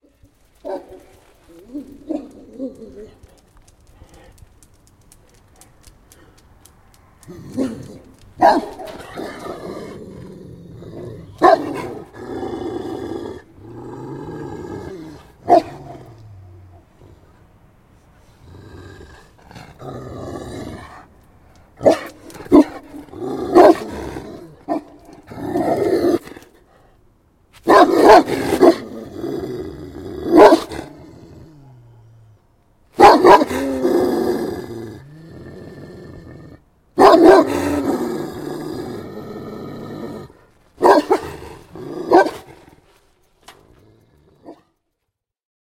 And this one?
Aggressive Guard Dogs
Two very ambitious watch dogs furiously barking and growling at me. If it hadn't been for that fence ... !
Growling, Dog, Bark, Guard, Watch, Barking, Rabid, Aggressive, Dogs, Rabies, Dangerous, Growl